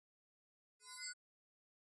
High tech effect
buttons, computers, future, futuristic, menus, pc, pop-ups, tech, windows